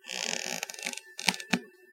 Taking a step on the creaky floor, almost sounds like a creaky door closing.Recorded with a Rode NTG-2 mic via Canon DV camera, edited in Cool Edit Pro.
hardwood-floor, walking, creak